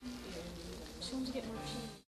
A trip to the movies recorded with DS-40 and edited with Wavosaur. Audience ambiance before the movie.
field-recording, ambience, theater